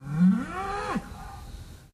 ANMLFarm Kuh-Bulle-Agressiv 09 IOKA NONE Hofefeld
Bull calling aggressively.
bull, calls, countryside, cow, cows, farm, fields, herds, moo, mooing